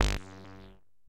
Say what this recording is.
sherman cable94
I did some jamming with my Sherman Filterbank 2 an a loose cable, witch i touched. It gave a very special bass sound, sometimes sweeps, percussive and very strange plops an plucks...
ac, analog, analouge, cable, current, dc, electro, fat, filter, filterbank, noise, phat, sherman, touch